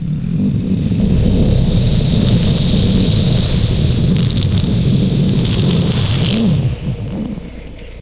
This sample is a multi-track recording of myself making rumbling noises and higher pitched sounds of an earthquake-type event in my room through a AKG condenser mic.
competition, earthquake, volcano, human-sample, earth